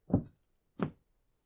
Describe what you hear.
Footsteps Wood 02
Walking on wood